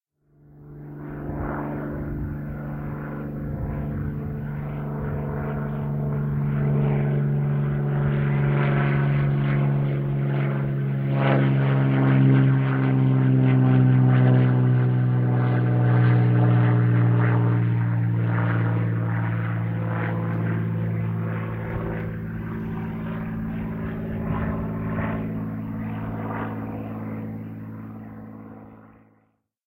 Mobile phone recording of a small propeller plane.